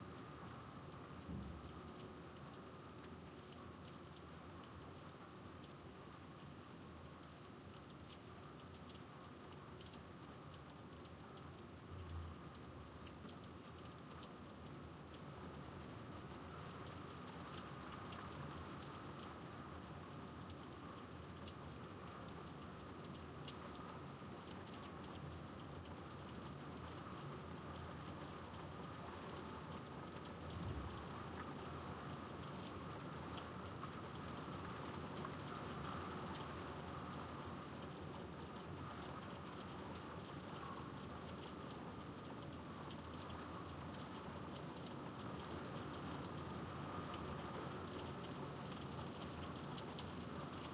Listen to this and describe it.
Medium room with some light rain outside the window. Minimal EQ applied.